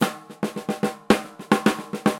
Snarefill1 1m 110bpm
Acoustic drumloop recorded at 110bpm with the h4n handy recorder as overhead and a homemade kick mic.